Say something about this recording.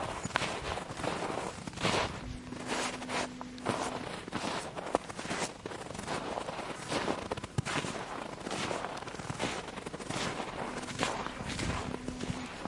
chuze vysokym snehem
walking through snow
footsteps, snow, steps, walk, walking, winter